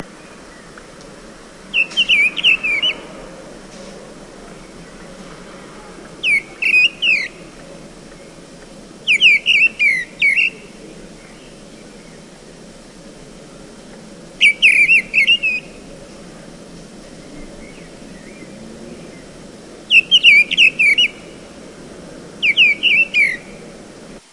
Mistle thrush singing it´s sad song sitting on top of a antenna in a suburb of Cologne, Germany. Sony Datrecorder, Vivanco EM35.
bird, ambient, field-recording, birdsong, town